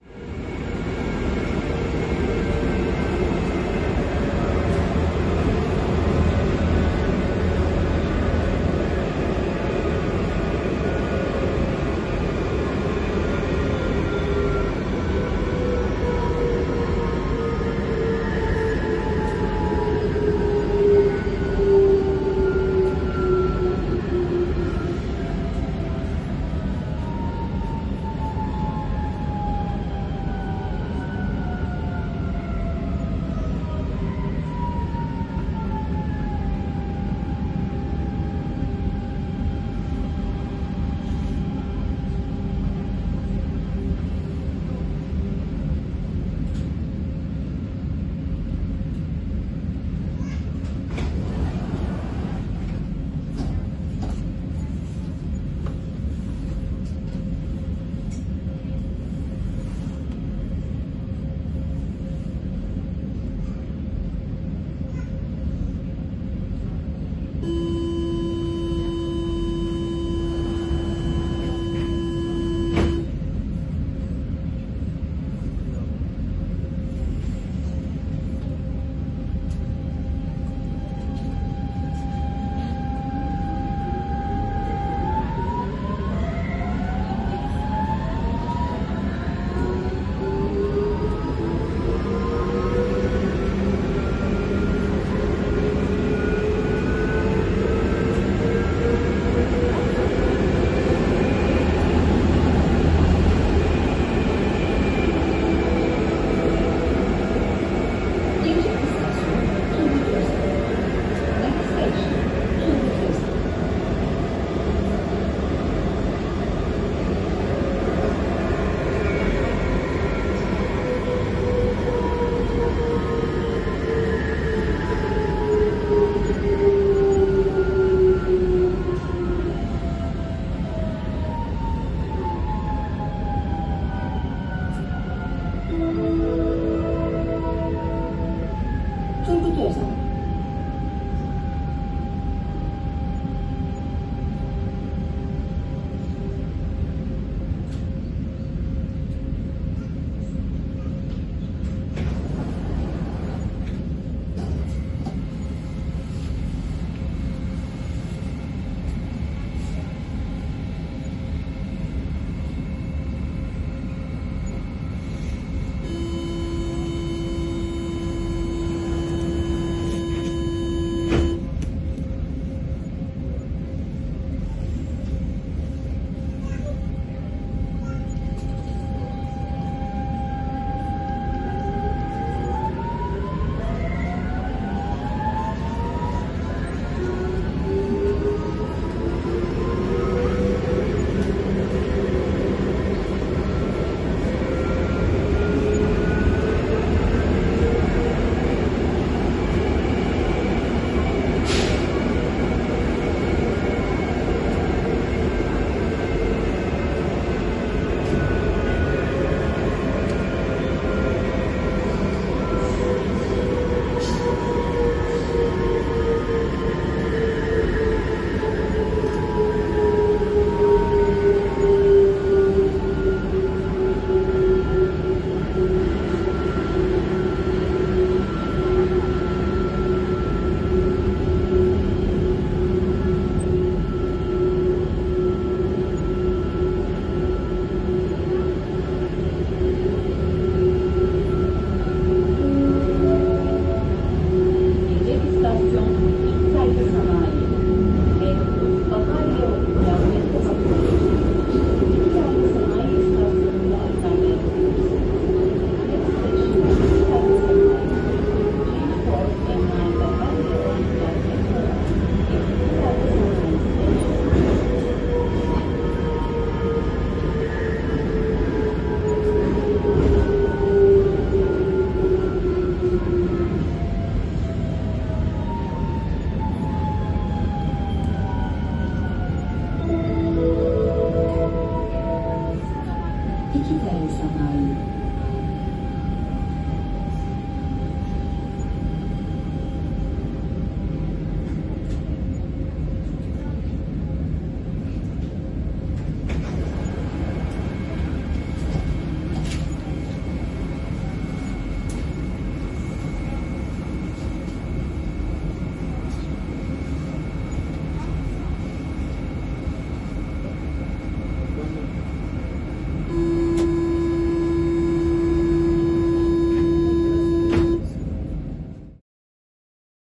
Sound of a Turkish metro. Includes door opening and closing, metro rattle, some passengers, and stop annoncements in Turkish.